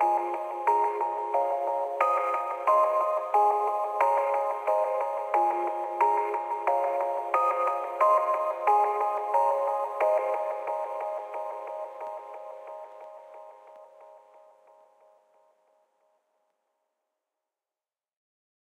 Mysterious But Happy Synth Pluck Loop [90 bpm] [G Sharp Major]
calm, delay, free, happy, loop, melodic, melody, mysterious, pluck, soothing, synth